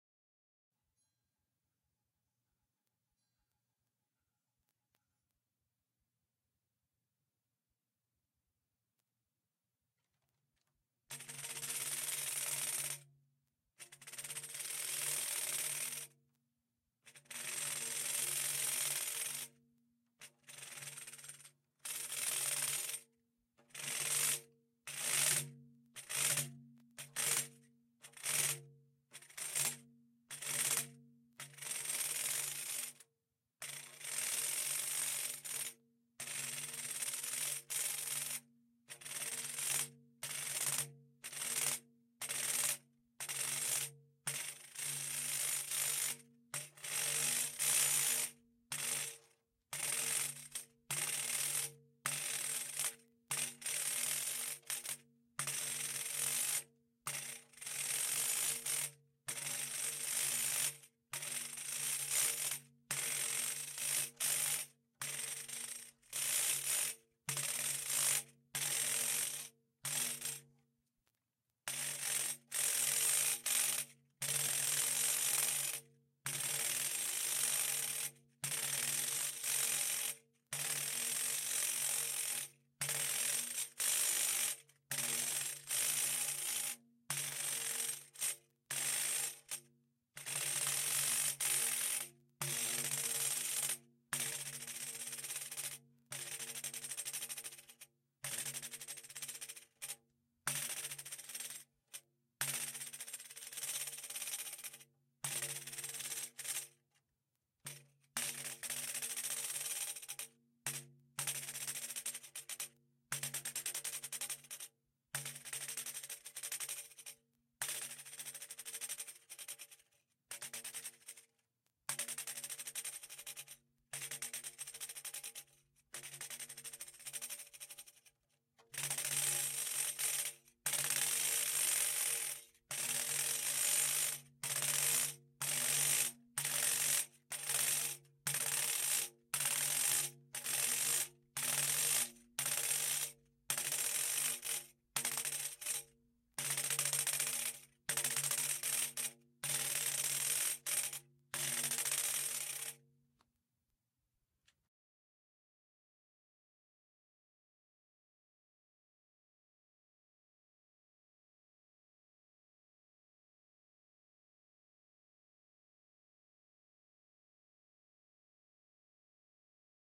Sound of a Pandeiro, a Brasilian Percussion Instrument